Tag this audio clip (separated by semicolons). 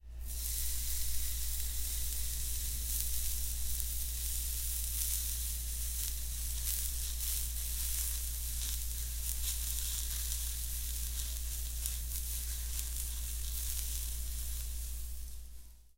cooking; fry; frying; kitchen; pan; stove